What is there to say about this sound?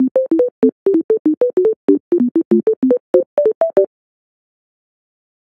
191bpm little-boops-LOOP
06.29.16: Made this at work randomly when I accidentally opened a software instrument - Logic's Klopfgeist. Enjoy a silly loop! A little bit of damping and panning going on.
191-bpm, analog, cowbell, mallet, marimba, noise, processed, synthesizer